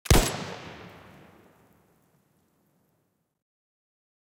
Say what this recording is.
A semi-automatic rifle, version 2, pitched up by 3 semitones
Made in bitwig, i used 10 different recorded gunshot layers that have been eq'ed and multiband compressed to form a new gun sound. Subbass was synthesized for the super low end.
The process i use is to select frequencies using high pass and lowpass filters from a recording for the lows, mids and highs. This forms a "layered sound"